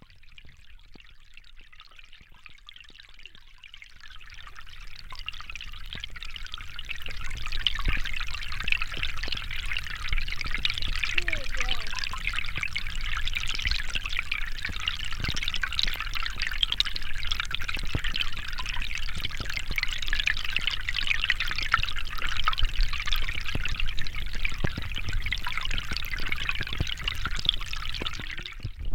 mayfield water flowing01
Underwater recording made with a hydrophone in a creek at Mayfield Park in Austin, TX
bubbly, gurgle, underwater, bubbles